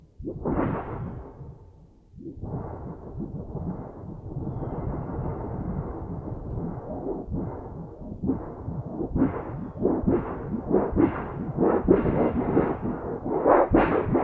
thunderbird heartbeat
Trying to simulate the heartbeat sound and that damn bird decides it's his time to make noise. I am supposed to be fixing the grill with a piece of sheet metal but when I picked it up I heard the noise and could not resist. All I hear is more hiss. Must be the Samson USB microphone.
metal, clang